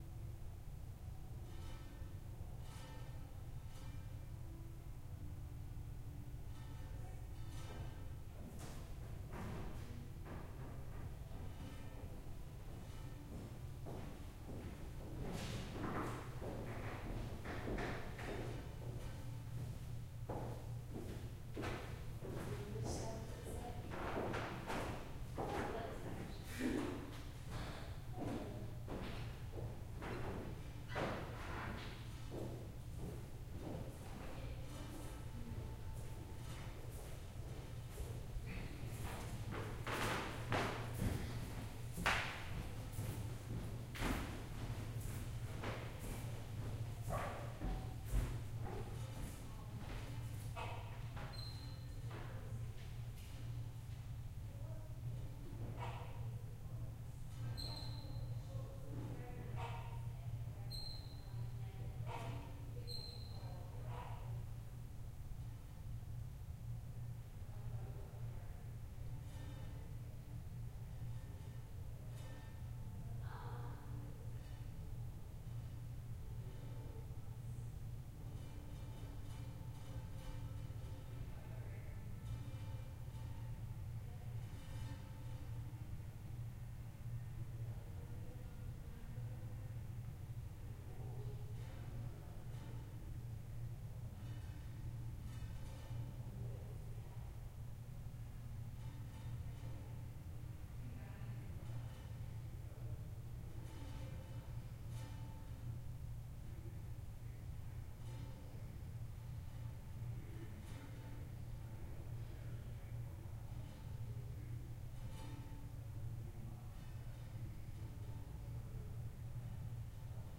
Quiet museum gallery
A gallery space at the National Museum of Ireland - Decorative Arts & History, Collins Barracks, Dublin, Ireland. You can hear people walking through the gallery, across wooden floors, and having a quiet conversation.
field-recording, museum, noise, people, ambience, gallery, footsteps, wooden-floor, general-noise, walking, room, soundscape